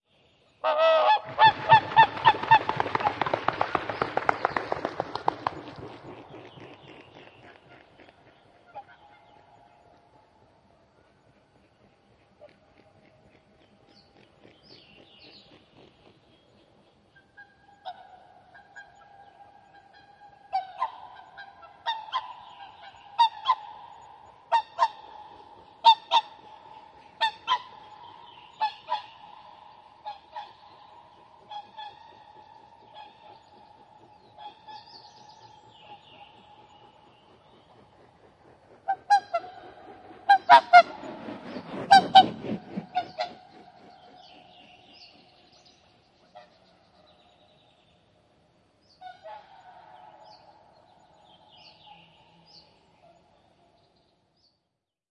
Laulujoutsen lähtee lentoon vedestä toitottaen, siivet läiskyttävät vettä, siipien ääni ilmassa. Pari muuta joutsenta lentää ohi äännellen, siivet kuuluvat, taustalla vähän muita lintuja.
Paikka/Place: Suomi / Finland / Kuusamo
Aika/Date: 31.05.1995
Bird, Call, Linnut, Lintu, Luonto, Nature, Soundfx, Spring, Suomi, Swan, Tehosteet, Vesi, Water, Whooper, Wings, Yle, Yleisradio